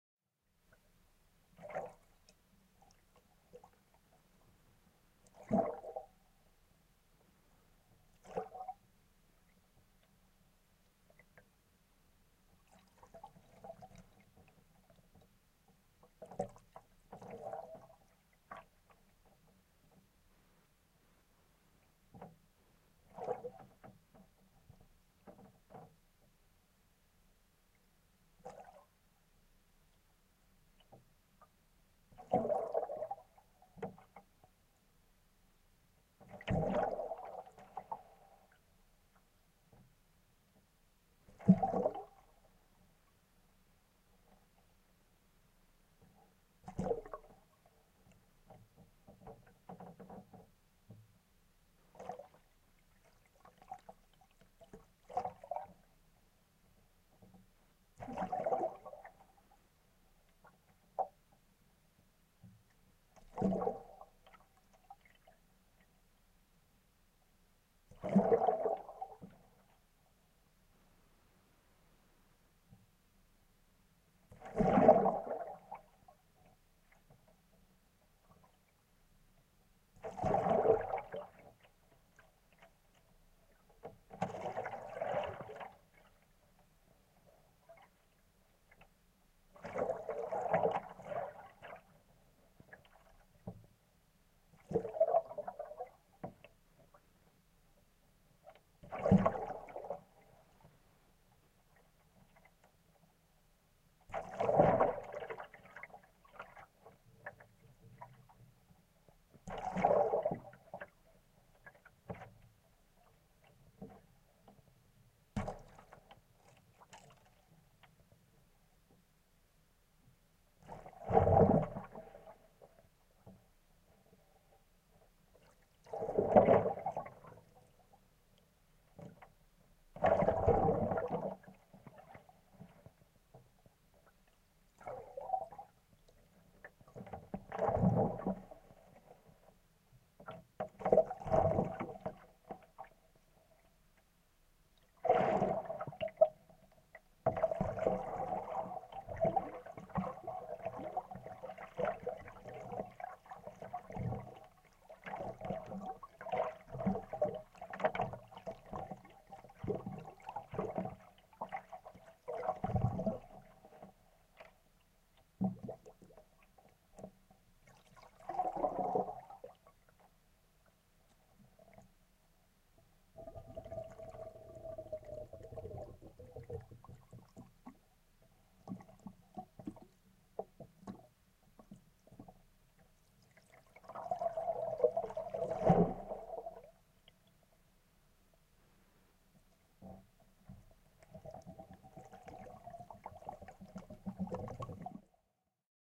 I recorded sounds from a bathtub in a studio at school both beneath and above the surface at the same time using some hydrophones and some condenser mics (I think I used a pair of Rode NT5s).
This sound and the other sound in this pack are recorded simultaneously and can be used in combination, which makes it easy to make your own mix of them.